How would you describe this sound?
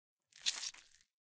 Squelching SFX [3]
Squelch sound effect for blood/flesh/stabbing/gore.
(I’m a student and would love to upgrade my audio gear, so if you like/download any of my audio then that would be greatly appreciated! No worries if not).
Looking for more audio?
blood, flesh, gore, Squelch, Squelching, tear